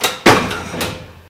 die, industrial, machine, factory, field-recording, metal, processing